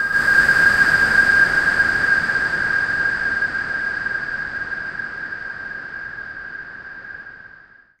SteamPipe 3 GhostBlow C5
This sample is part of the "SteamPipe Multisample 3 GhostBlow" sample
pack. It is a multisample to import into your favourite samples. A pad
sound resembling the Ghost blow preset in the General Midi instruments
from several manufacturers. In the sample pack there are 16 samples
evenly spread across 5 octaves (C1 till C6). The note in the sample
name (C, E or G#) does not indicate the pitch of the sound but the key
on my keyboard. The sound was created with the SteamPipe V3 ensemble
from the user library of Reaktor. After that normalising and fades were applied within Cubase SX & Wavelab.
ambient, atmosphere, blow, industrial, multisample, pad, reaktor